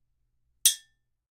golpe metal
hit, iron, metal